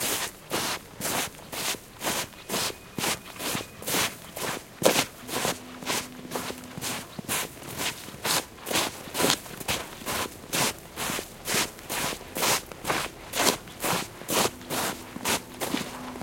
soupani nohama po zasnezene silnici
shuffling along a snowy road
footsteps, shuffling, snow, walk, walking